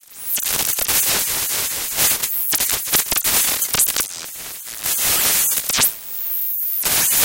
Mechanical abstraction 2
Chain rattling synthesized into some kind of radio transmission sound. It was made using Ableton.